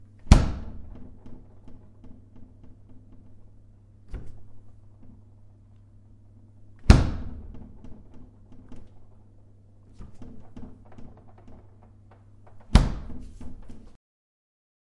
opening fridge
Opening and closing a fridge.
close, door, fridge, open